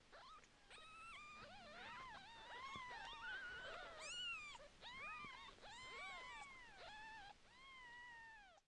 Kittens: The screeching of 3 week old kittens is very distinct. Real kittens can be heard here. It is high-pitched and a “close-up” of these sounds coming from these tiny creatures. By overlapping some of the screeching it creates the illusion of more kittens. A slight purr can be heard which comes from the mother. Recorded with the Zoom H6, Rode NTG.
animal, meowing, kitten, meow, kittens, cute, OWI, purr, cat